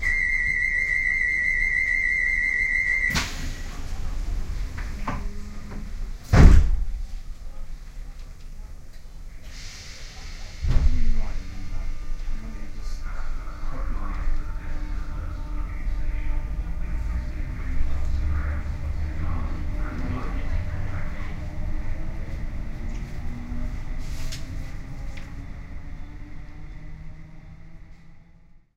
Train doors close
UK based train ambiance
ambience, binural, door, people, shutting, train